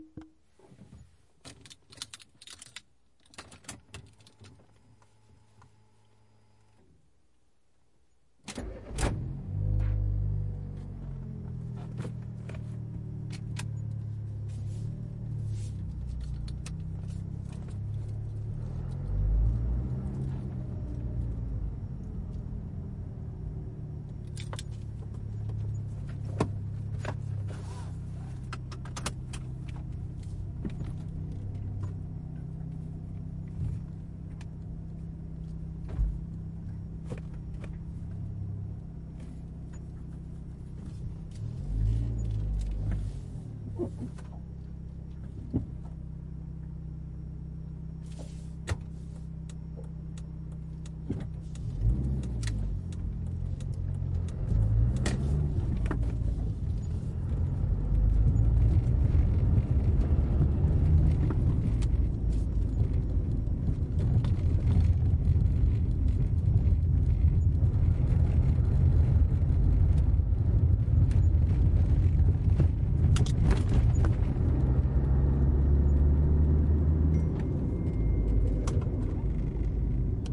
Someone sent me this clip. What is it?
car start
car being turned on, key, gear and acceleration
vehicle, motor, engine, car, driving